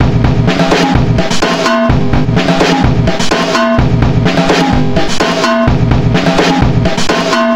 drummer X remix 3 (original : "junk break" by VEXST)
VST slicex combination + FL studio sequencer + db glitch effect vst
groovy, loops, drum, quantized, loop, drumloops, drums, beats, beat